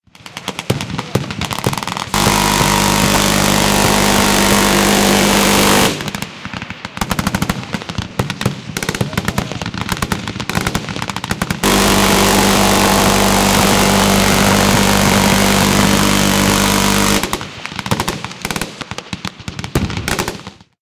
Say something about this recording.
minigun ver1
7.62x51 NATO M134 Minigun burst fire, 500 rounds (approx.) from 200 feet. Sony ECM-672 shotgun microphones, into Shure field mixer and then digital video camera. Other automatic fire can be heard in-between bursts.
automatic, burstfire, minigun, weapons